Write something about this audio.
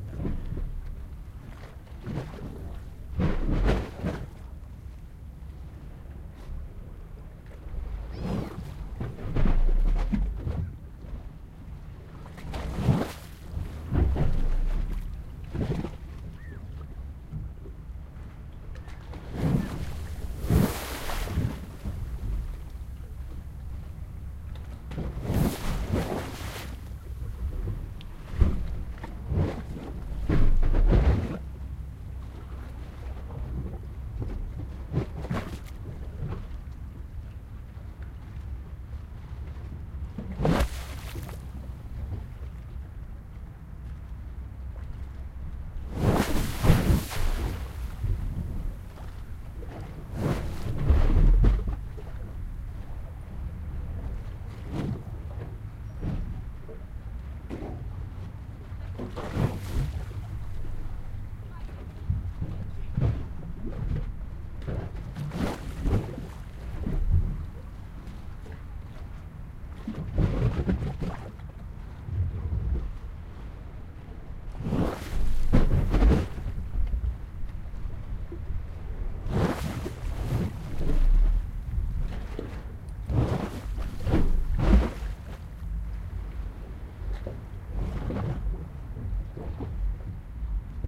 Field recording made in the Hudson River Park in NYC, with a Zoom H1. River is sloshing underneath the pier, creating a suction effect. Low drone of traffic and boats in the background.
waves, pier, water, sloshing